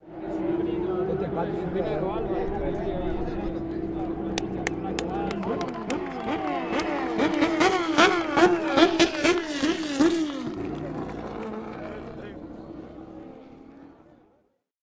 TC.Salta.08.RevvingUp.PatoDiPalma
crowd, turismo-carretera, field-recording, race, sound, revving, car, zoomh4, engine